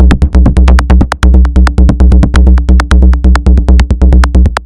this is a very bassish drumloop made with the newsCool ensemble in reaktor.
greetings from berlin!
drum, drumloop, drums, electro, granular, loop, machine, sequence, tekno, trance